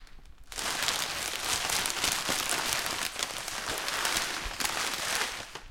plastic crumpling
Plastic bag being crumpled
bag, crumpling, OWI, plastic